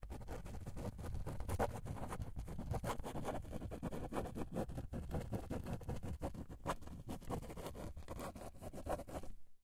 Writing with a pen across the stereo field, from right to left.
Recorded with a Tascam DR-40, in the A-B microphone position.